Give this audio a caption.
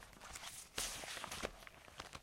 Paper slide and crumple
swish, metal